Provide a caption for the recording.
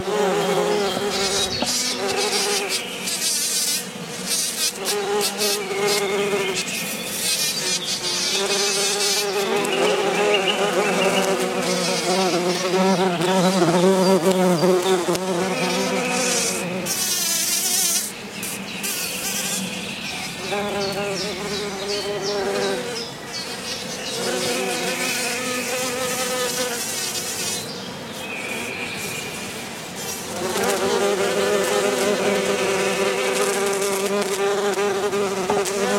I recorded a few bees in the forest.